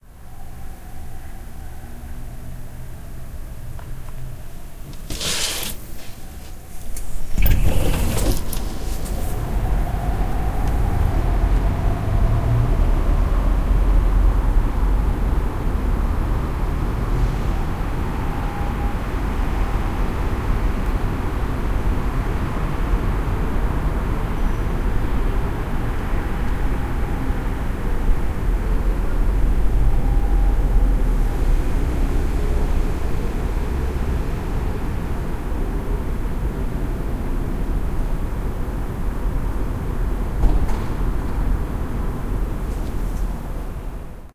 Curtain window N
close, closes, closing, curtain, door, open, opening, opens, window